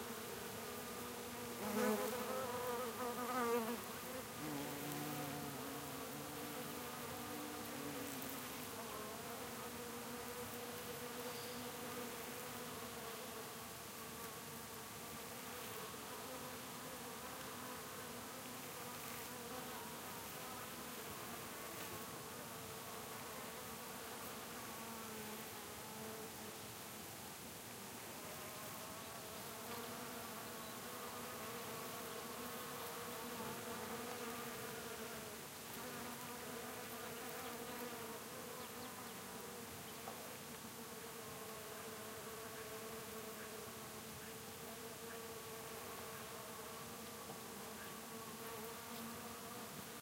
20080206.rbd.bees.02
late winter ambiance in scrub, with bees buzzing at flowers of Rosmary bushes
field-recording, insects